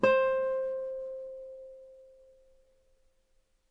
2 octave c, on a nylon strung guitar. belongs to samplepack "Notes on nylon guitar".
a, guitar, music, note, notes, nylon, string, strings, tone